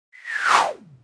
A swish with descending center frequency.
Click here to animate this sound!